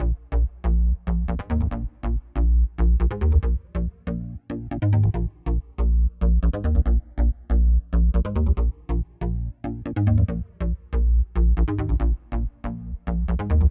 Cool Bass Loop
8-bit, awesome, chords, digital, drum, drums, game, hit, loop, loops, melody, music, sample, samples, sounds, synth, synthesizer, video